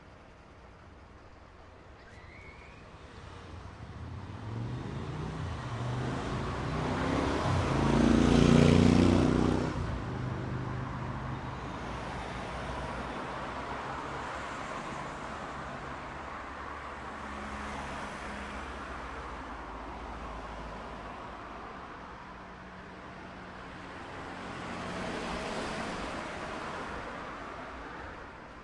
080808 29 City Traffic Road Bus
waiting on bus station with diesel lorry on the right
bus, road, traffic